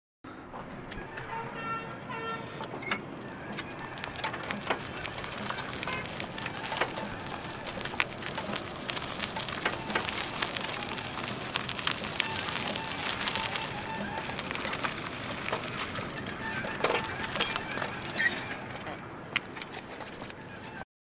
Auto Rickshaw - Wheels on Gravel
Bajaj Auto Rickshaw, Recorded on Tascam DR-100mk2, recorded by FVC students as a part of NID Sound Design workshop.
Auto, Autorickshaw, Richshaw, Rick, Tuk